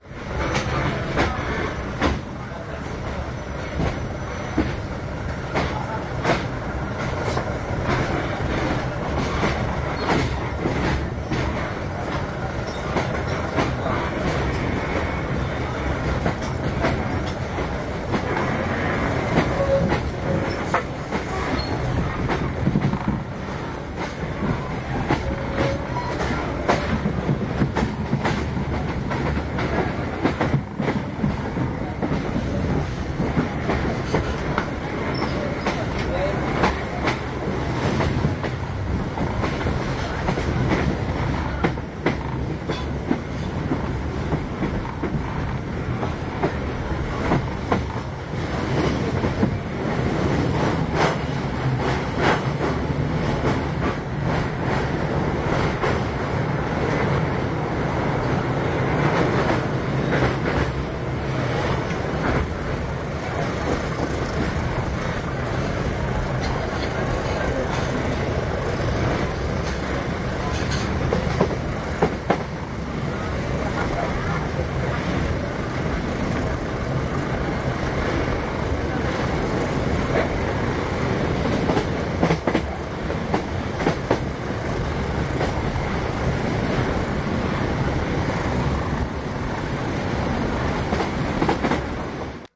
train moving clacks creaks tracks Jakarta INDONESIA 940316
Portable cassette recording at rail station, Jakarta, Indonesia. March 1994.
clacks, field-recording, railway, train